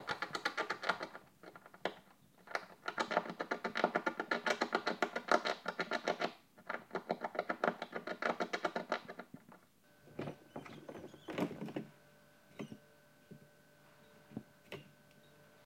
Rats Gnawing, Scratching, Squeaking and Scuttling
Rats scratching and gnawing on the wooden joists in my ceiling. They are also heard scuttling and squeaking. Recorded using a Sony PCM D100 using the built-in microphones.
I made this recording to prove to my landlord that there was a problem with rats in the house.
gnawing, rats, rodents, scratches, scratching, scuttling, squeaking